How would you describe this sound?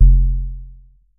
tx81z bassdrum01
A bass/kick drum sound created with a Yamaha TX81z FM synthesizer.
bass, digital, drum, electronic, fm, kit, percussion, sound-design, synthesis, tx81z, yamaha